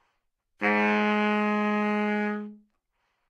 Part of the Good-sounds dataset of monophonic instrumental sounds.
instrument::sax_baritone
note::G#
octave::2
midi note::32
good-sounds-id::5259